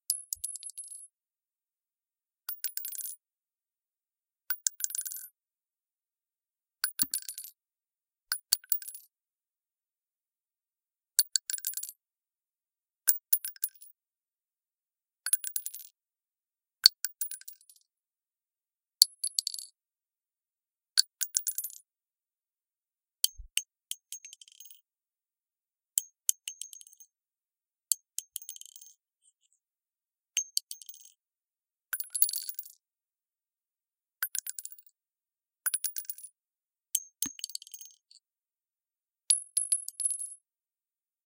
Bouncing Shell Casings (Various Sizes)
Shell casings being dropped onto a tiled floor. I got some shell casings from a local shooting range and recorded the sound of various sized casings bouncing on a tiled floor. I used a USB condenser mic (Samson CO1U Pro) with Audacity. The section of floor I dropped the shells onto was surrounded by acoustic panels to dampen the echos. A couple times the casing bounced into the mic stand, but I've left them in because they're still useful. There was some background noise caused by my computer which I removed with Audacity.
bullet, 9mm, casing, dropped, gun, shell, game, 357, bouncing, magnum, rifle, bounce, 762